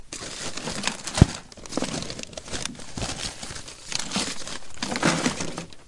Held the mic up to the desk drawer and shuffled around things looking for something. Not much to it. Recorded with a $30 mic from Target. A Samson M10. Recorded in Audacity.